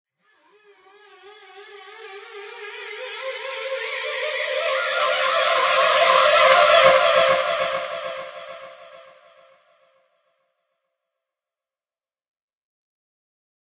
just a riser
build, buildup, riser, sweep, swell, up, uplifter